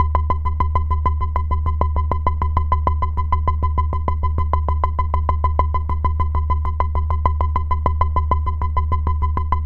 Moogment Thrill 125
1st sound uploaded since very loomg time! happy to contibute some more ,)
This loop is part of my modular synth experiments, will upload some some.